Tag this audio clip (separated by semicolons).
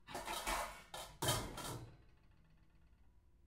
kitchen; pans; pots